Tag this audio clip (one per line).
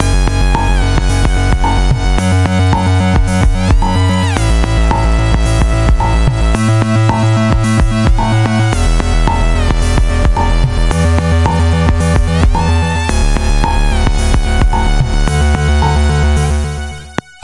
110bpm
B
dorian